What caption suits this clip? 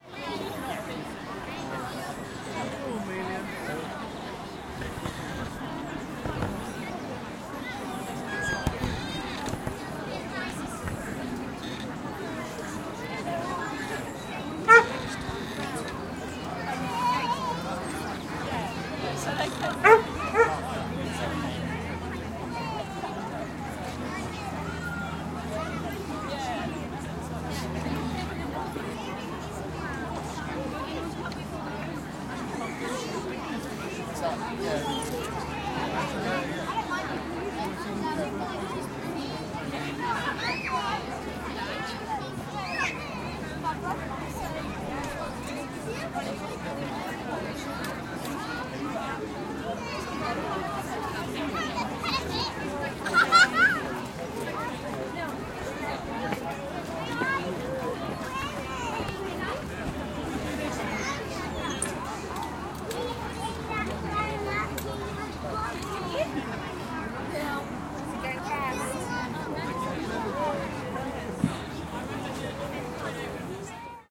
Playground Milton Park 3
Recording of loads of children and adults on a a playground.
Location: Milton Park, Cambridge, UK
Equipment used: Zoom H4 recorder
Date: 24/09/15
adults, children, kids, play, playground, talking